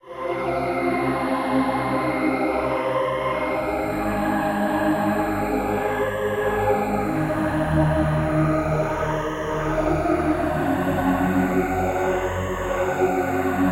Power to my soul
A new loop features Gated synth and Dark Choir with phasers to reveal more melodic feel.
sad, phaser, trance, gated, melodic, chior